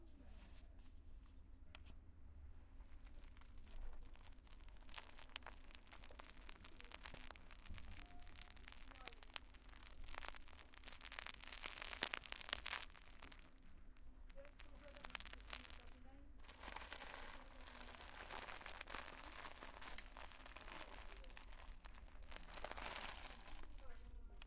one in a series of recordings taken at a toy store in palo alto.